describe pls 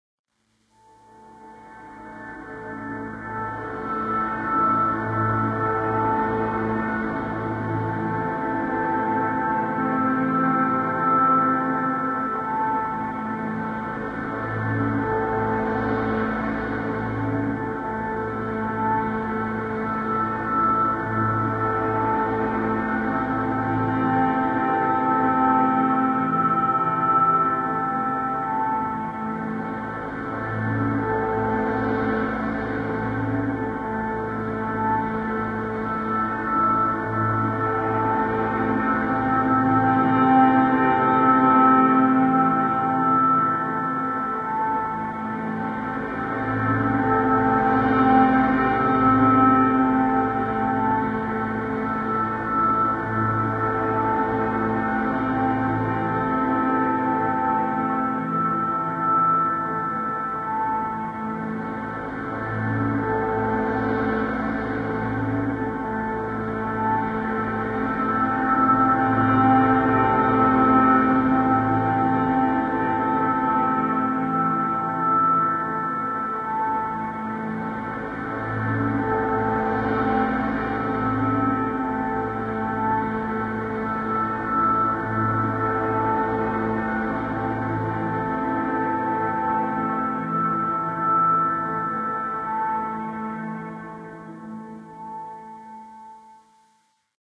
With midi instruments i Logic Pro I created some samples. Bits of sounds and small melodies. Mostly piano and bass. I run some sounds trough Scream Tracker 3 and made more melodi sounds. The samples i got from this was in the end processed in Sound Hack using convelution blending to files together.
floating, suspence, mystery, strange